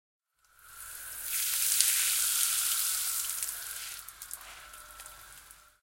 water sea spray E04
A hose spray nozzle spraying while passing the mic. Can be used as sweetener for sea spray hitting the deck of a ship.
hose, nozzle, sea-spray, ship, spray, water